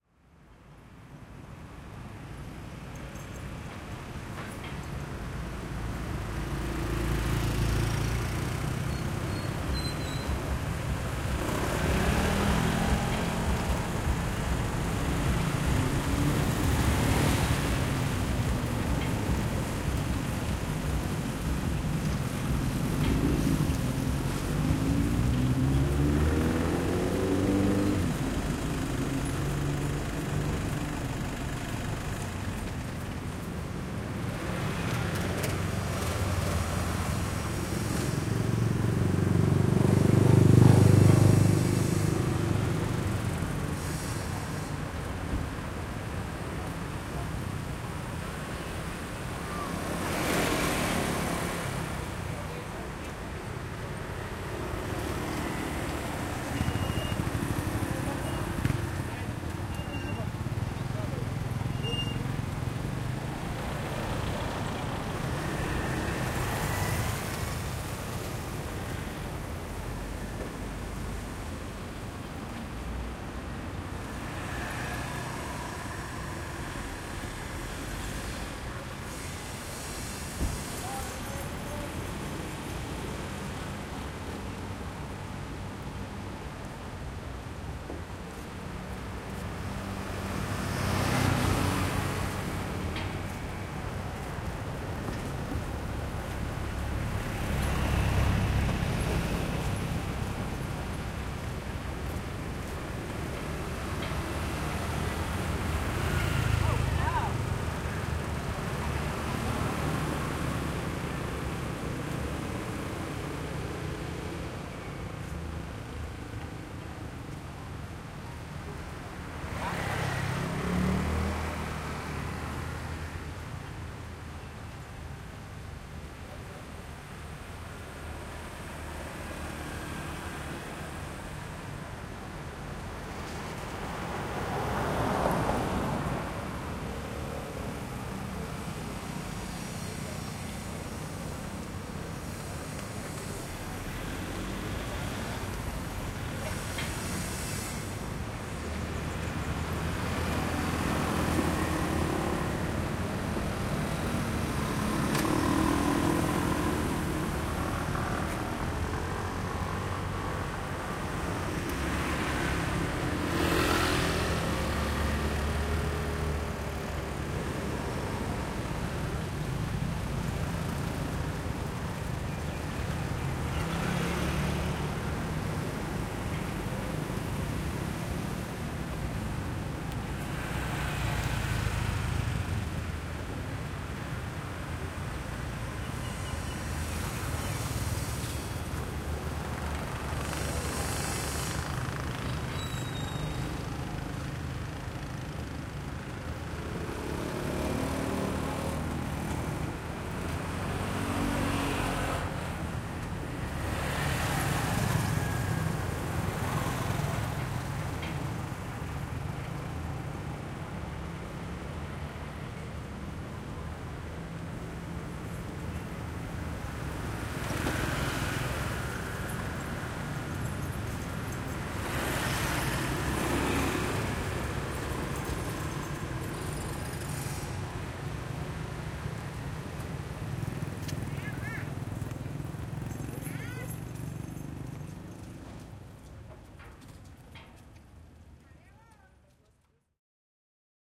Busy crossroad in Thong Sala town, Koh Phangan Island, Thailand.
Motorbikes, cars & people passing by Rode NT-SF1 Soundfield microphone.
Ambisonic recording made with Zoom F8 recorder.